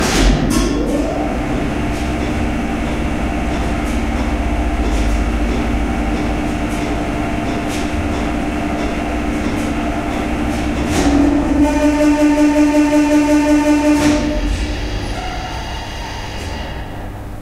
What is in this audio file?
An old Soviet/Russian elevator running on low speed.
Before an elevator reaches the stop point it enters precise stop point when its motor switches to the lower speed. When it runs on low speed it produces pretty industrial sound.
This is elevator nr. 2 (see other similar sounds in my pack 'Russian Elevators')
elevator, engine, hum, industrial, machine, mechanical, motor, noise